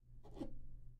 Grab Object
This audio represents when someone grab's somerhing from any surface.
Grabing, Sound, Object